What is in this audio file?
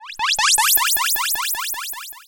Generated sound effect
fx, sound-effect, game-sound, sfx, game-effect